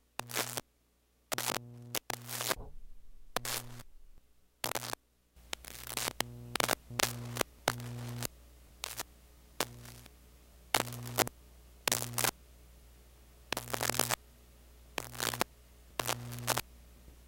Shorted out leads of a 12volt transformer. SM58 to Mackie to Extigy sound card